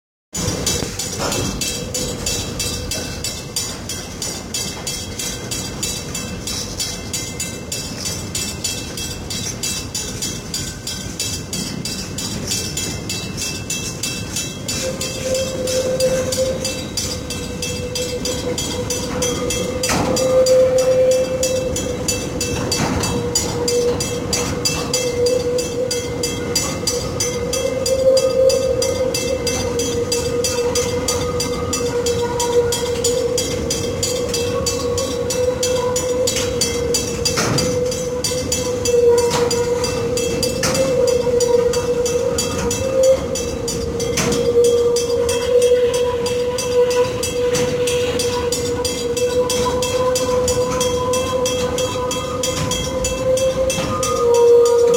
This High Quality recording is of a Cargo Logistics Train leaving the Port Of Fremantle, pulling many, at least 50, Sea Containers on rail-cars through a railway crossing on a bend, curve of the line. You can hear the signal bell on the drop gate with flashing red lights and the steel rail-car wheels screeching on the curved track.